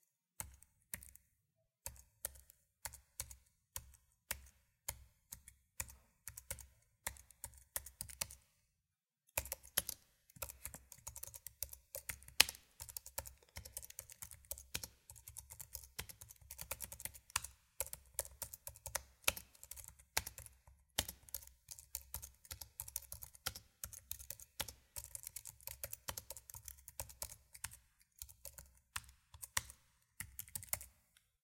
keyboard typing mac
Mac soft keyboard being typed. First just single stroke keys, then real typing, with spacebar and multiple fingers.